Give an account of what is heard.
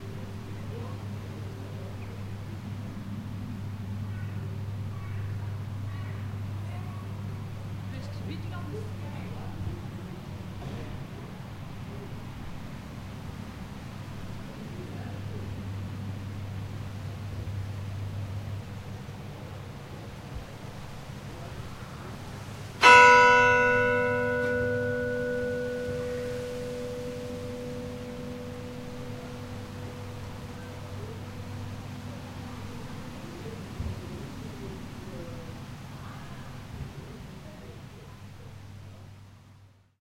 Kerkklok Mendonk
This is a recording of the churchbell of Mendonk striking 3:30PM. It was recorded from the graveyard. On the background you can hear some passers-by.
This recording was made with a Sanken CS3e on a Roland R-26.